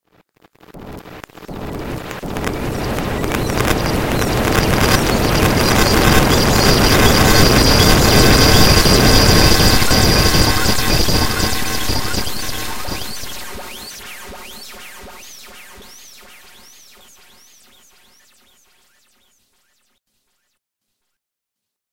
Noisy Thing
All these sounds were synthesized out of white noise being put through many, many plugins and filters.
noise, hi-fi, minimal, minimalistic, silence, fx, experimental